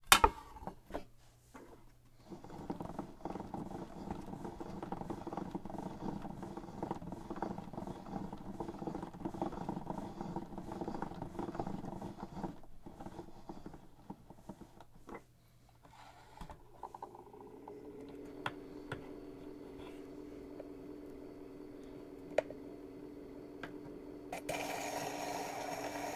This is an old 1920'3 or 1930's era gramophone with a 78rpm record being placed on it, wound and then started.
78, record, rpm, start